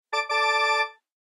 Reward Notification 3 1
Synthetized using a vintage Yamaha PSR-36 keyboard.
Processed in DAW with various effects and sound design techniques.
Alert, Sound, High, Design, Synth, Vintage, PSR36, Minimal, Long, Digital, Synthethizer, Off, Low, Yamaha, Keyboard, Muffled, Error, Notification, Short, Percussive, Reward, Bell, On